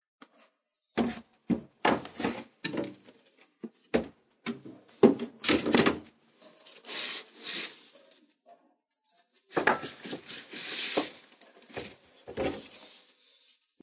Old Wood Sideboard
Sideboard being opened and finding things inside of it.